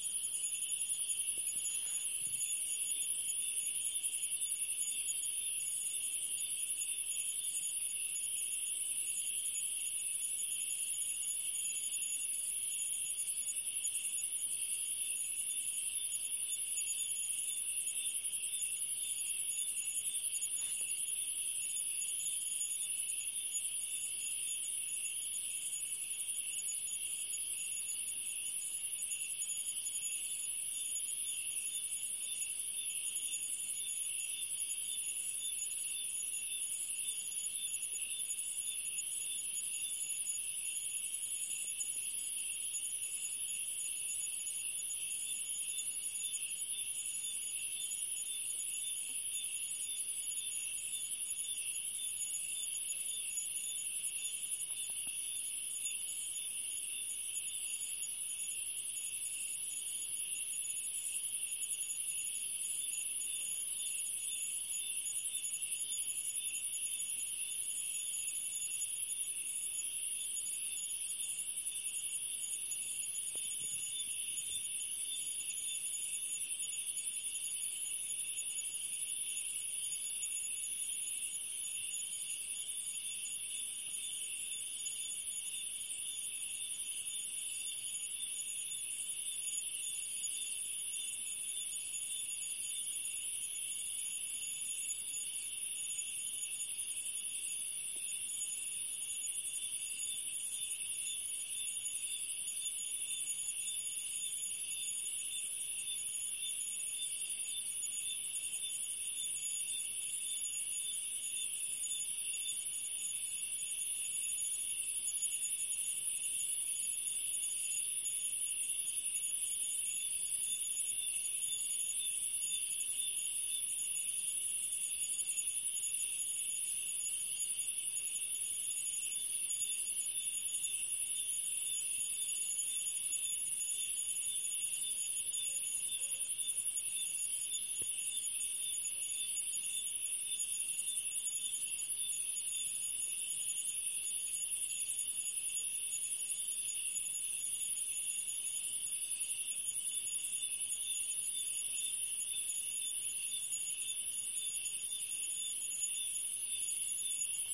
2014-01-19-20 15 46 rwanda akagera night
I took this recording at the nationalpark akagera in rwanda at night
recording, night, ambience, nature, cicadas